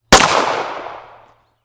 9mm pistol shot
A 9mm pistol being fired.
gun, weapon, 9mm, gunshot, handgun, pistol, shot, firing, shooting, sidearm